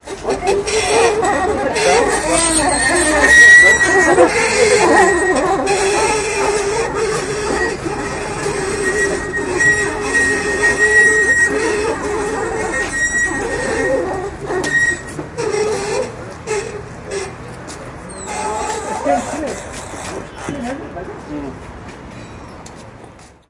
squeaky trolley squeaky trolley
This recording was made in Medina, Marrakesh in February 2014.
Marrakesh, trolley